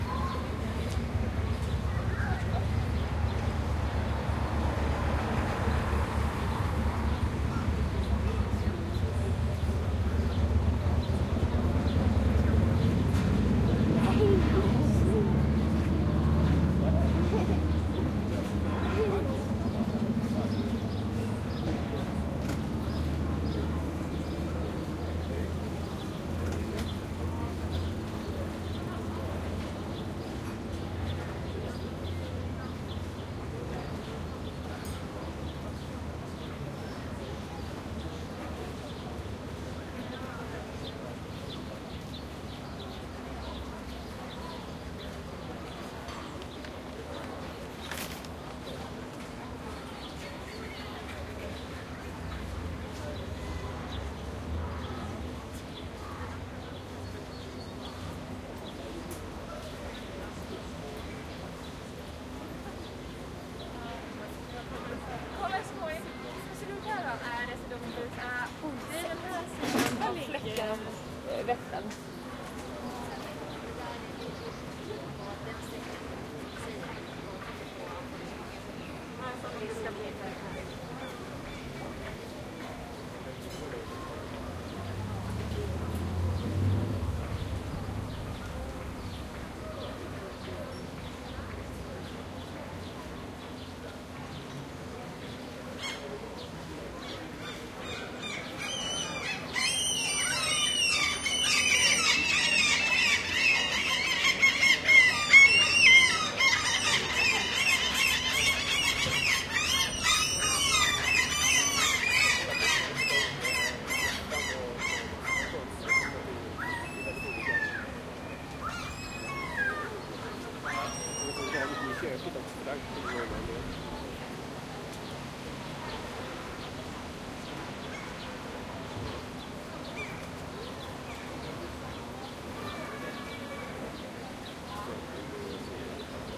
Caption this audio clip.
170717 Stockholm Nytorget R
General soundscape of the Nyrtorget in Stockholm/Sweden, a young and trendy little area with a small park and playground, lots of cafés, and full of young people and children playing and relaxing. It is a sunny afternoon and there is a large amount of pedestrian and some automobile traffic underway. At the end of the recording, a fierce altercation ensues between a number of seagulls about some morsel tossed onto the street from a nearby café... The recorder is situated at ear level on the sidewalk of the Skanegatan, facing into the center of the street, with the playground and park in the rear and some cafés in the front.
Recorded with a Zoom H2N. These are the REAR channels of a 4ch surround recording. Mics set to 120° dispersion.